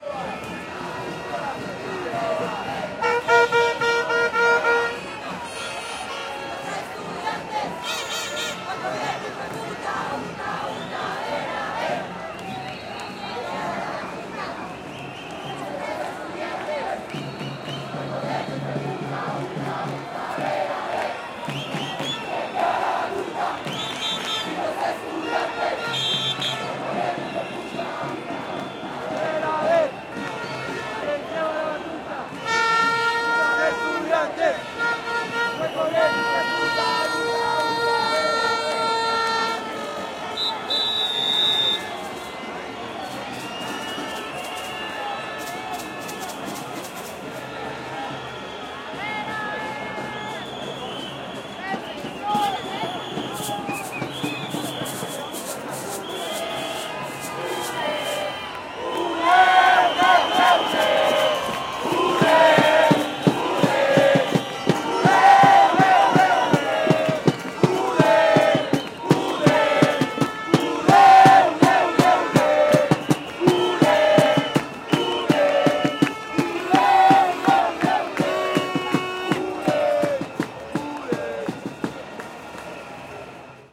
Demonstration2Bogota 10 11 11
Recorded in Bogota Colombia on a demonstration of students. The demonstration was for free education. Equipment was a Rode NT-4 Stereo mic thru a Rode Boom and a Fostex FR2.